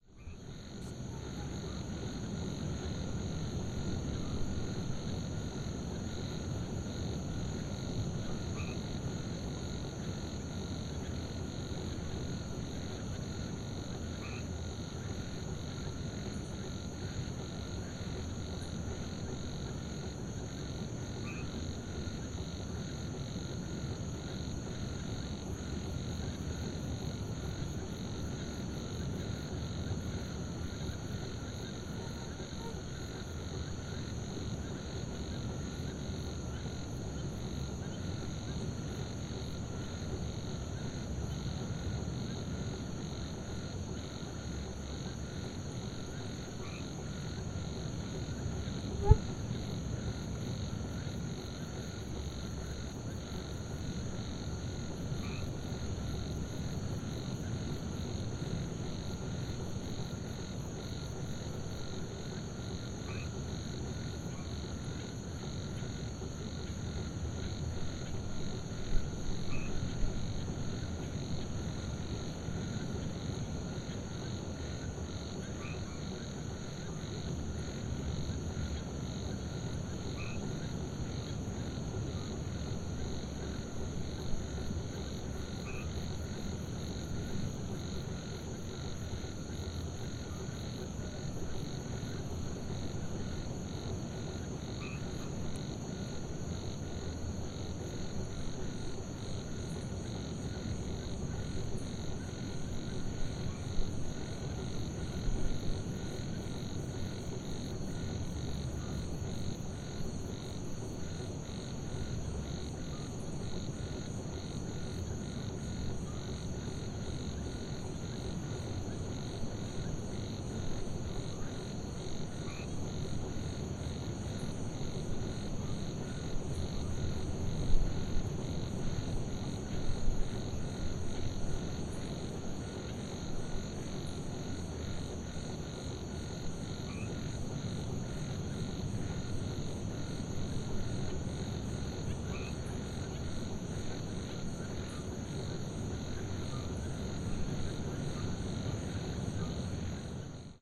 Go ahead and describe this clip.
FR.PB.08.Lagoeiro.008
Night ambience at Praia Branca, Brazil
frogs, zoomh4, sound, insects, nature, field-recording, ambience